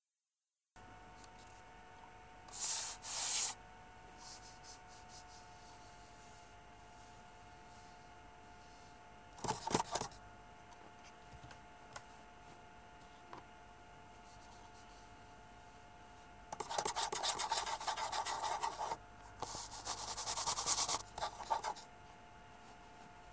chuck-cartavvetro
noise, glitch, chuck